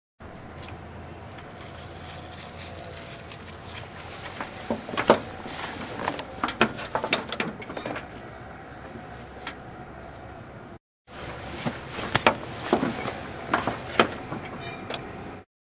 Auto Rickshaw - Sitting in the Back Seat
Bajaj Auto Rickshaw, Recorded on Tascam DR-100mk2, recorded by FVC students as a part of NID Sound Design workshop.